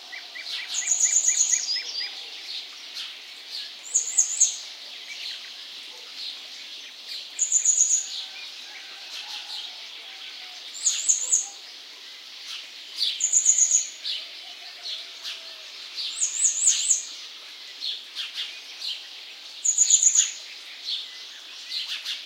high-pitched call from a bird I couldn't see. Sennheiser MH60 + MKH30 into Shure FP24 preamp, Edirol r09 recorder. Decoded to mid-side stereo with free Voxengo VST plugin
ambiance, birds, countryside, field-recording, nature, south-spain, spring